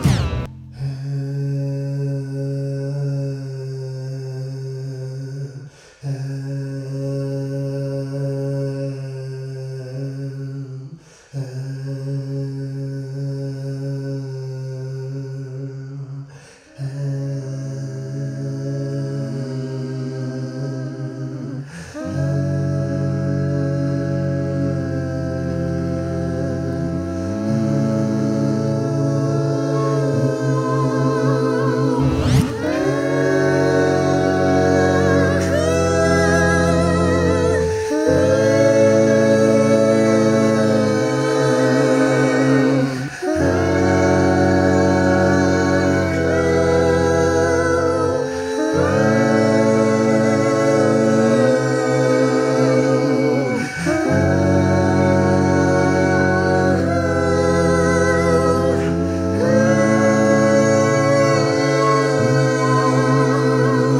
It was recorded on Cubase 5 with an AT2020 USB mic. There were about four vocal tracks
vocal harmony starting in F#. it builds up in stages and gets better towards the end. the chords are F#7, F7, A#, C#. i think it's 80bpm. apologies if not